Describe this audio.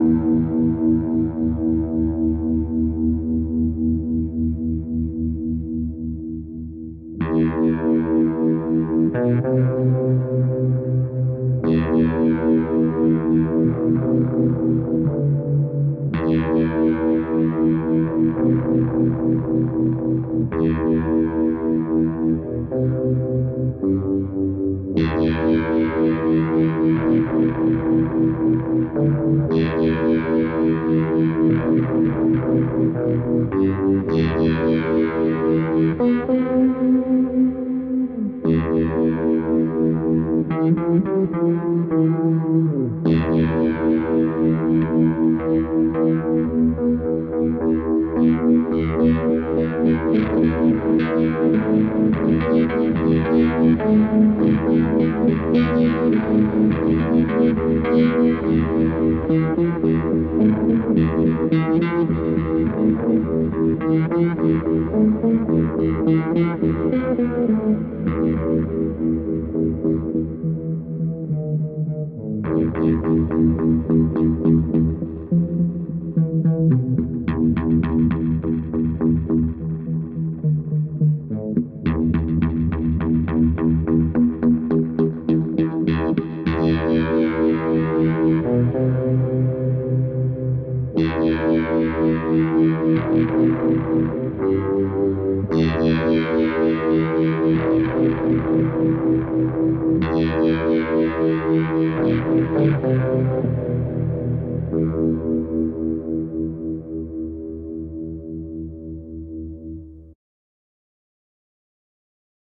Space guitar noise. The question has always been…what is the space between space?